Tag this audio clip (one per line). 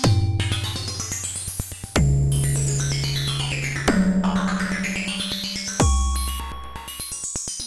atonal
beat
drum
loop
percussive